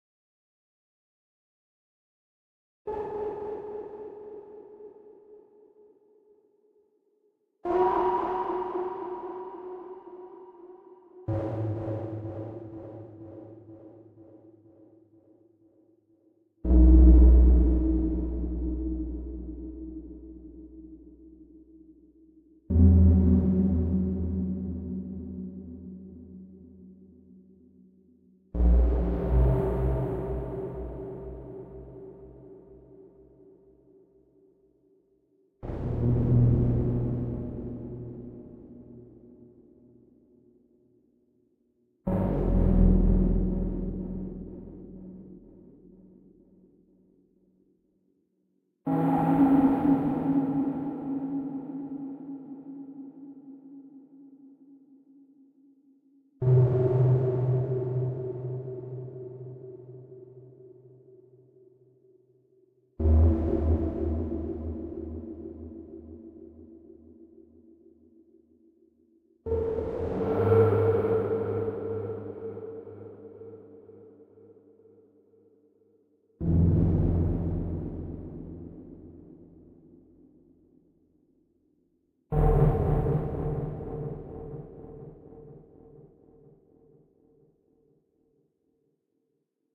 Sci-Fi High Tones
Weird tones coming from a synthesizer, creating a sci-fi atmosphere.
tone, tones, artificial, scifi, atmopshere, droid, synthesizer, robot, things, high, drone, sci-fi, space, low, bladerunner, stranger, evolving